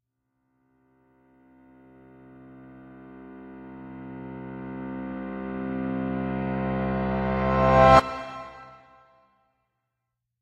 HH140 Reverse Piano
A sample of a piano playing a CMaj chord in Reverse.
Intro, Major, One, Shot, Snickerdoodle